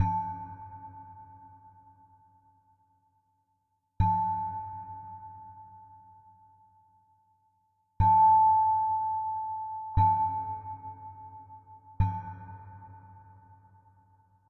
The faint sound of church bells in the distance.